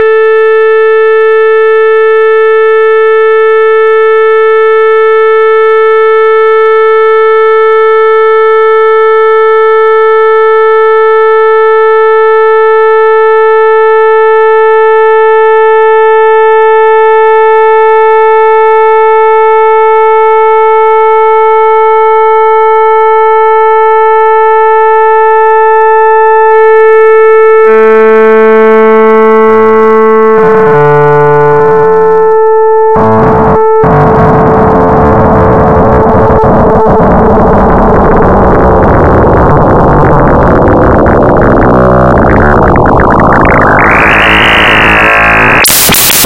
from simple 440hz sound, to chaotic low A 55hz sound
made from 2 sine oscillator frequency modulating each other and some variable controls.
programmed in ChucK programming language.